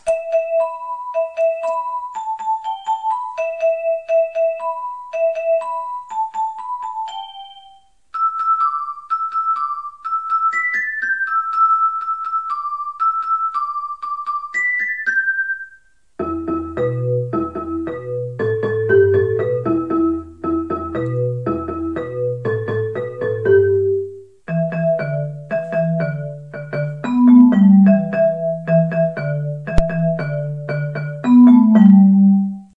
Annoying Piano Loop
A short piano loop. I used it myself, for the game Tricky Circle Puzzle.
annoying, exploingbananna, game, high, loop, music, piano, simple, tricky-circle-puzzle, vibra-phone